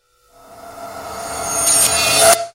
Build Short 02
a short build-up to a crash sound
build, grow